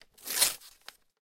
This is a sample from my sample pack "tearing a piece of paper".